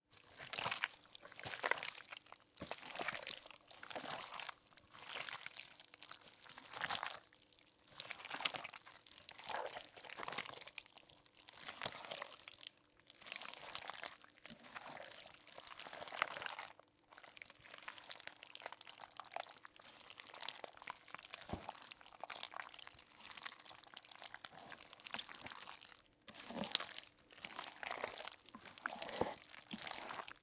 The sound of meat tenderloin being stirred